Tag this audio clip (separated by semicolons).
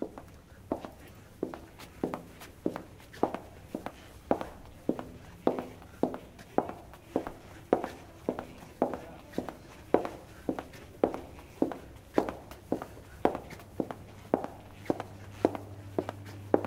concrete; floot